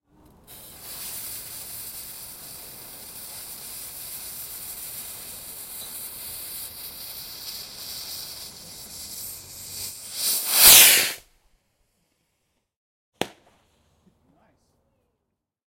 Firework - Ignite fuze - Take off - Small pop
Recordings of some crap fireworks.
Fizz, whoosh, ignite